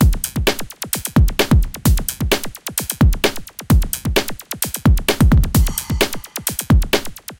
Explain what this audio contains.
Electro Fab 003
Produced for music as main beat.
drum, electro, industrial, loops